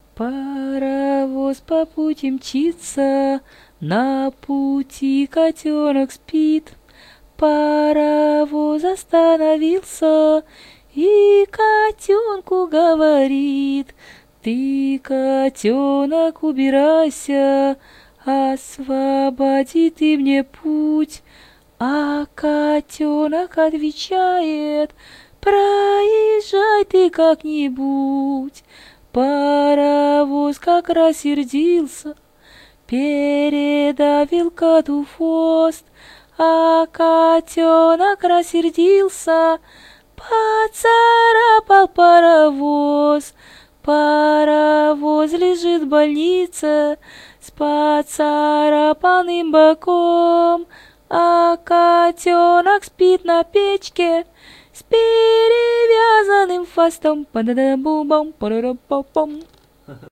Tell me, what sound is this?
Mama`s songs
ukraine, song, mama